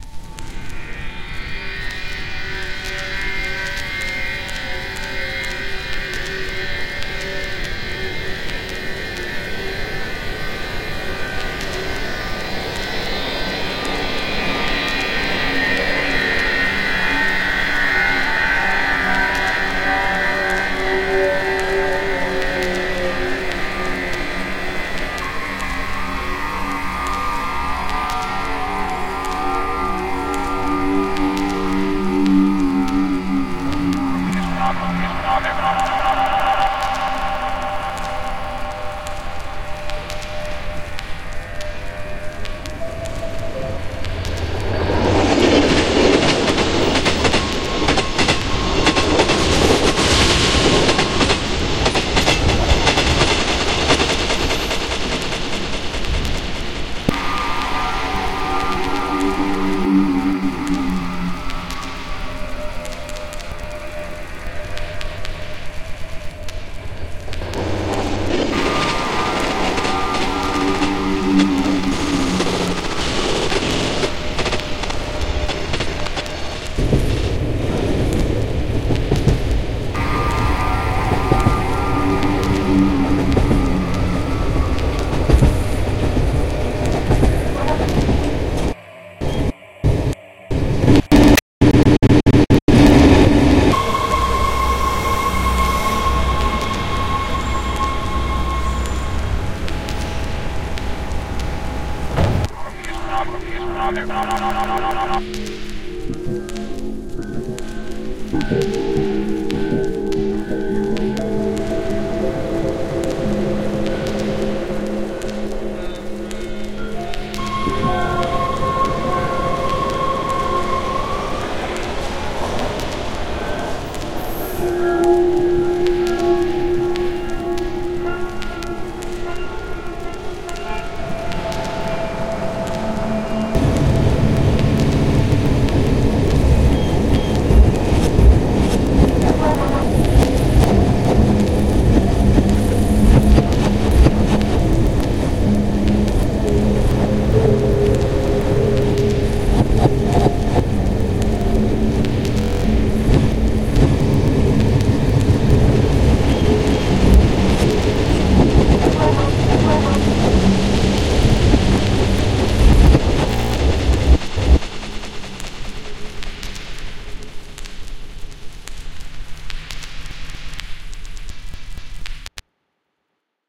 The fear of trains, a very active drone.
ambient, drone, eerie, evolving, experimental, pad, soundscape, space